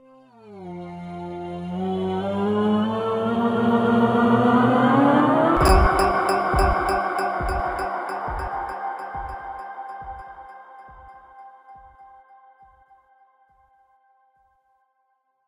End of Time
This sound was a creation for Logon and Logofsound on a OS.